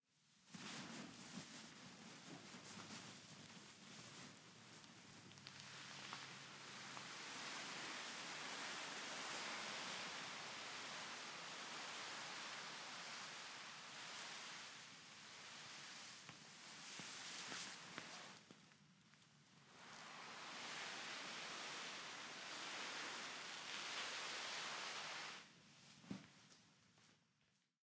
I dragged a cloth tarp with sandbags on it around a concrete floor to simulate dragging a dead body.